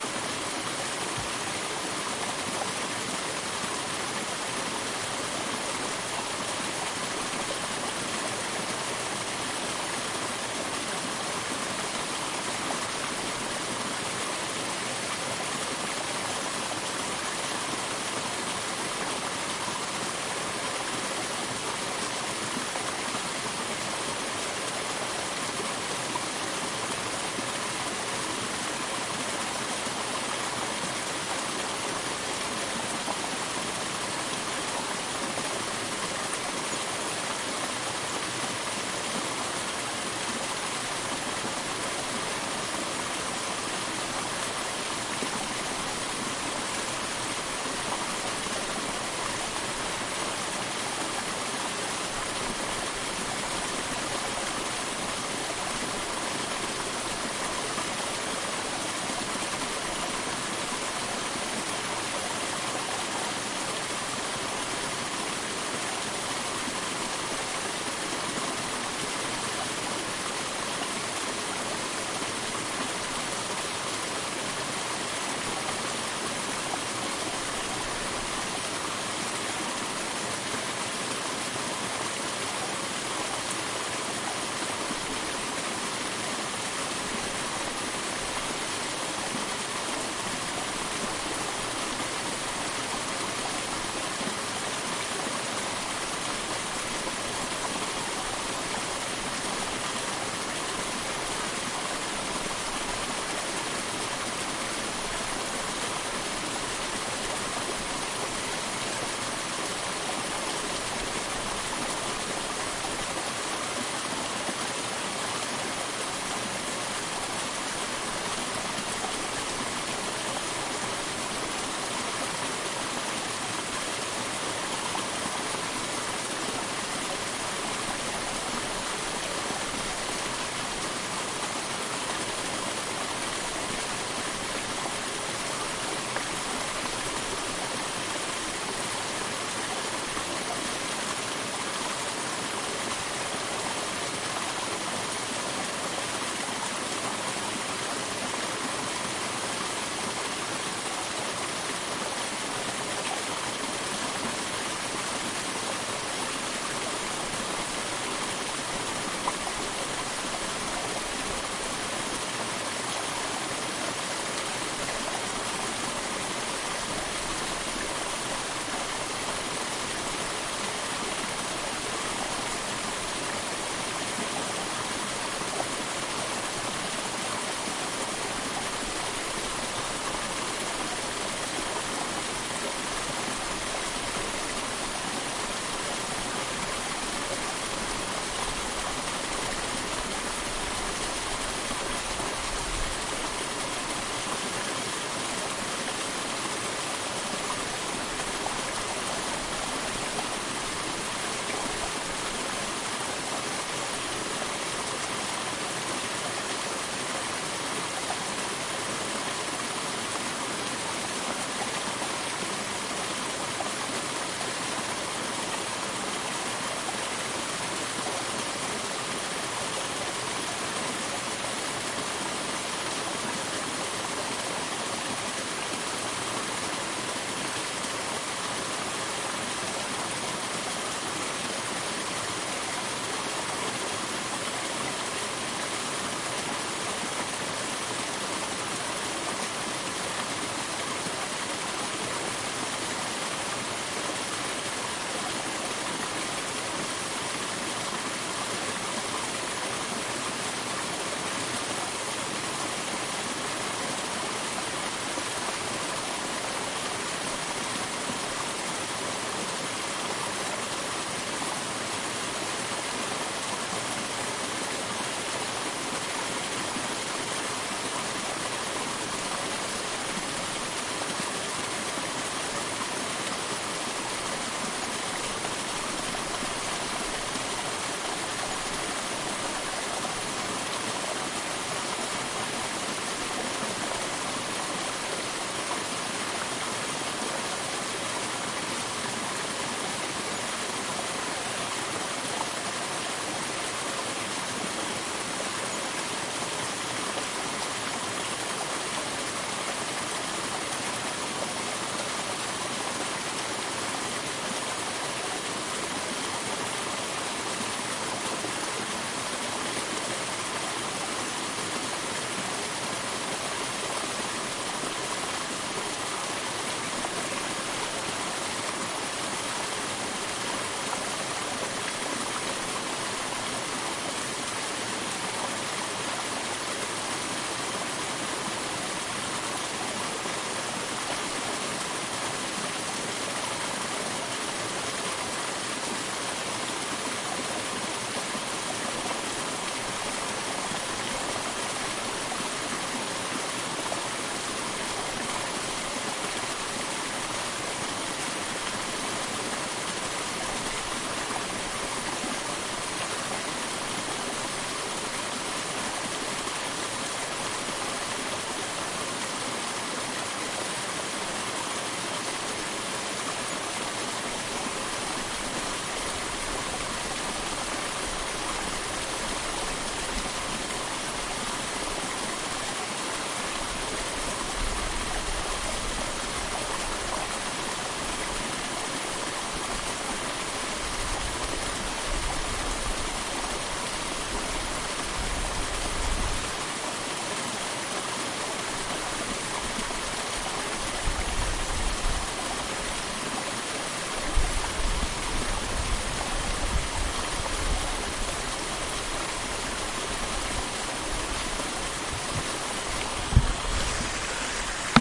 the sound of big stream in the winter mountain forest - front
big-stream, field-recording, forest-river, waterfall, winter